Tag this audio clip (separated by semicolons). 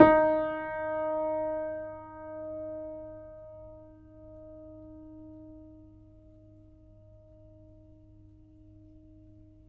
german; multi; old; piano